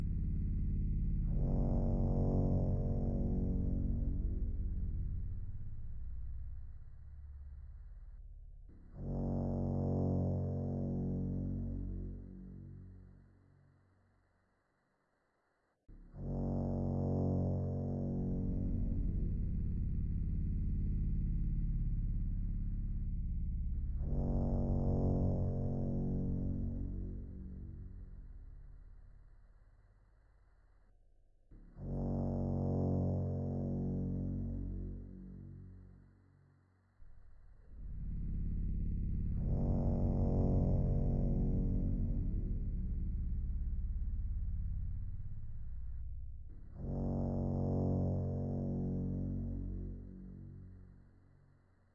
Atmosphere very low pitch sinusoid 01
Atmosphere with horn very low pitch make it. Mutated synthetic sounds with Pro Tools TDM plugins.